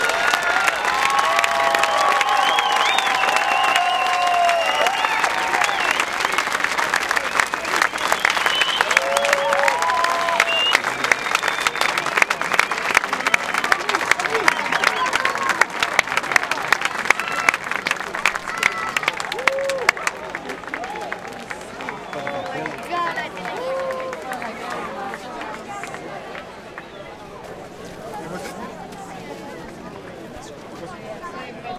applause cheer ext medium crowd enthusiastic whistling for outdoor show nice if take out close claps
applause, cheer, crowd, enthusiastic, ext, medium, outdoor, show, whistling